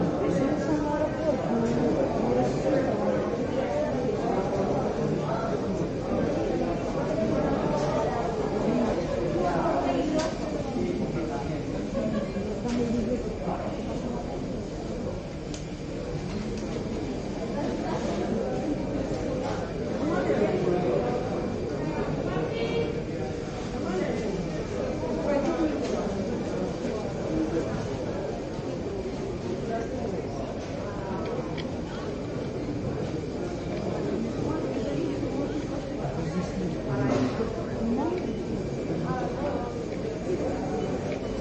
Ambience noisy crowd in large terminal room at the airport,
5.1 sound
airplane, airport, arrival, baggage, traveler